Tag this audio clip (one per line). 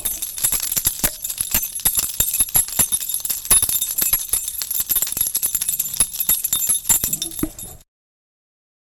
alarm
bell
keys